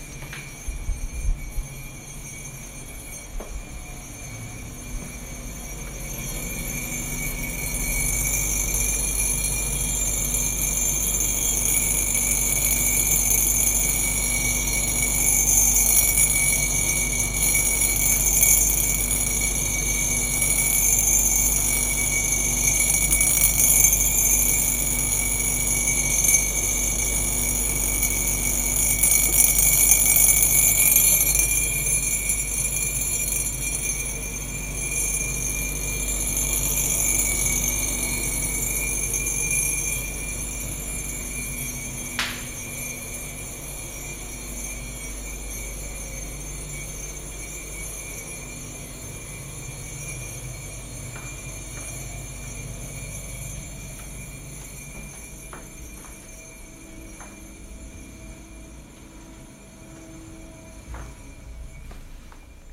this is the sound of the rattling sound of a metal spoon inside a mug on a water bottle on a drinking cooler.